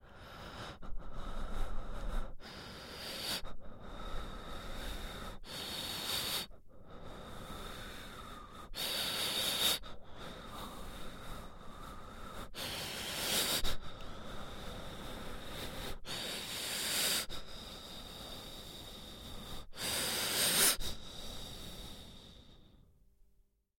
Mouth Breating
Mouth breathing, close miked in studio.
breating, close, exciting, Mouth, studio